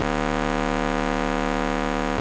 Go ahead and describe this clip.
Recorded direct to soundcard from scanner. Great for fake Atari 2600 sounds.